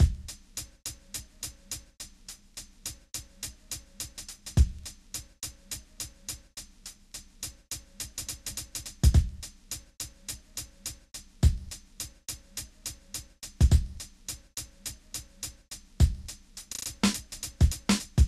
Composure Beat 2
stuff, cut, hiphop